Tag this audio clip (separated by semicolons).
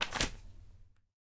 book; environmental-sounds-research; flick; flip; magazine; newspaper; page; paper; reading